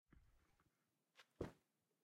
Laundry basket drop more clothes
Dropping a laundry basket onto carpet. Recorded with an H4N recorder in my home.